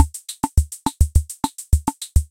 In the seventies George Mc Crae had a worldwide Superhit with `Rock your Baby`.
This one is the original loop of the drum machine ,which I created with audacity,
using sine tones and filtered noise.